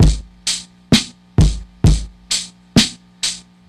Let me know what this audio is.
recording of a beat from a thomas organ, recorded with an old RCA ribbon Mic to DAT. sampled and looped with a k2000
acoustic, analog, beat, loop